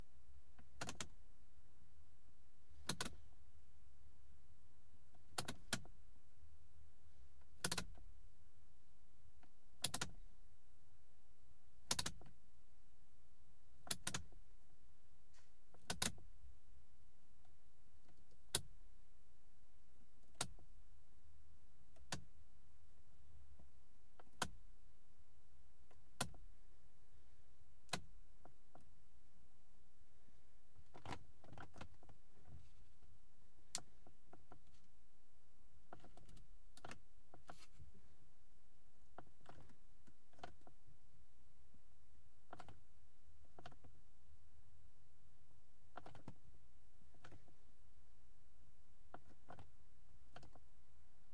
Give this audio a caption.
Windshield Washer selector switch on a Mercedes-Benz 190E, shot from the passenger seat with a Rode NT1a. The switch is rotary and has 4 positions - off, interval, slow constant, and fast constant. You will hear individual clicks, plus a fast sweep from off to fast. The arm also has a press button to activate the washer fluid system, which is heard last.
benz, field-recording, mercedes, rode, switch, zoom